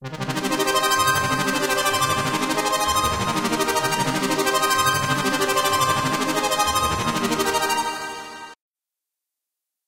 A synth loop arpeggio in 3/4.
arpeggio, beautiful, bright, synth, tremelo